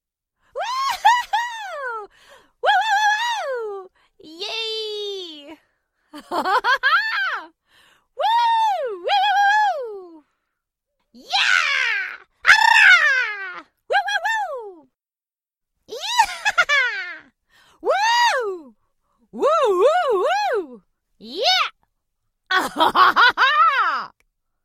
AS069238 yeah
voice of user AS069238